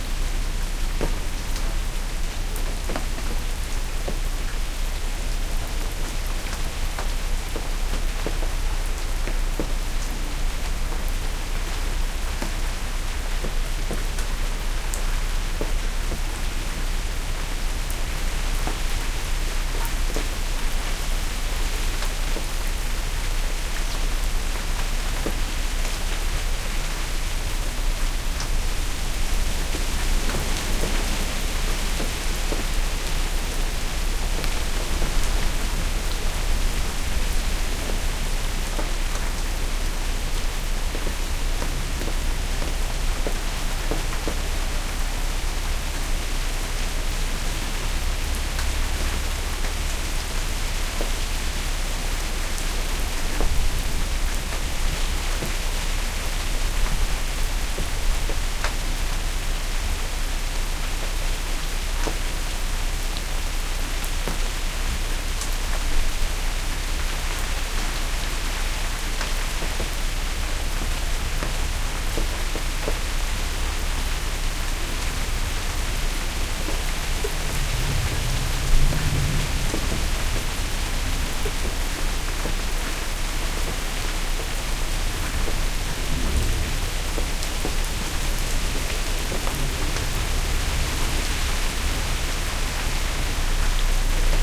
Rain-OC818
Recording of a light rain in a garden, with Nagra EMP preamp, Nagra VI recorder and Austrian Audio OC818 microphones in ORTF